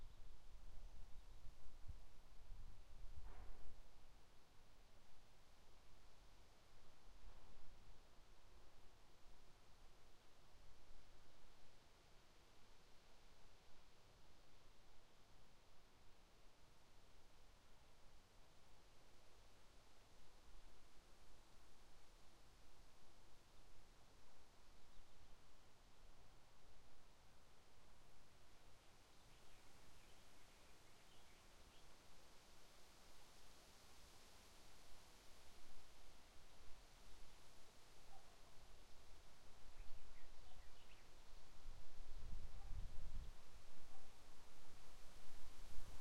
ambience, field, forest, Sergiev Posad
Recorded using tascam dr-100 mk2 near Sergiev-Posad.
forest, field and occasional village sounds.
field, forest, village, bird, field-recording